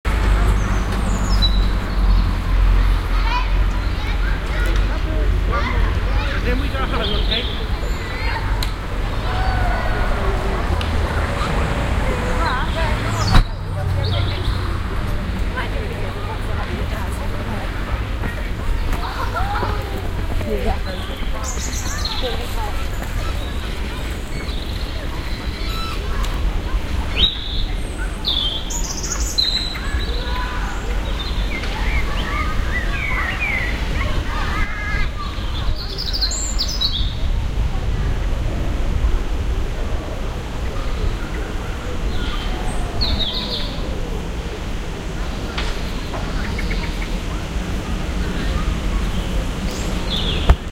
Highbury and Islington - Playground + Birds